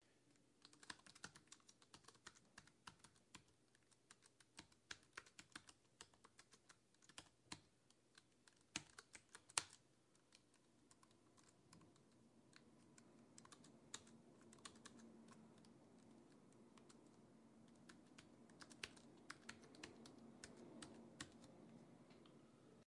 Laptop Keyboard
Typing on an Apple Macbook keyboard recorded from about 12 inches
Keyboard, Desk, Laptop